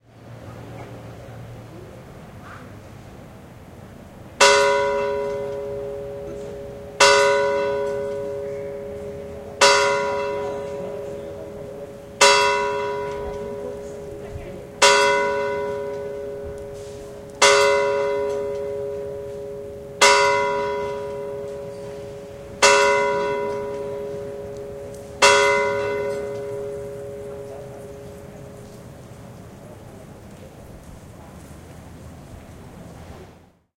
bells SMP close
Bells of the small church of Sant Marti de Provençals (Barcelona). Recorded with MD Sony MZ-R30 & ECM-929LT microphone.
barcelona, bells